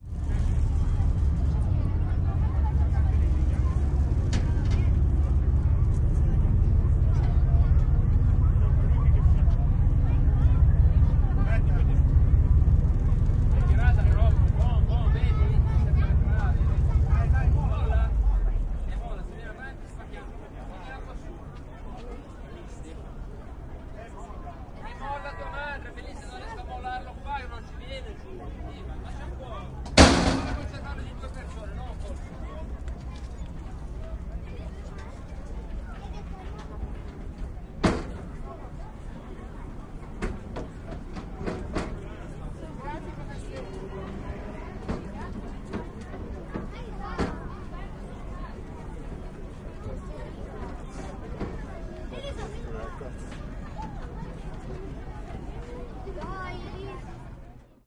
ferry arrival
A ferry arrives at the port of Genova. After some chatting, the crew throws down the gateway and people start getting in